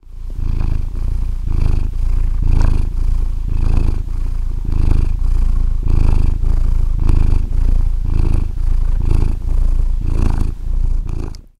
A little cat purring
animal, cat, field-recording, purring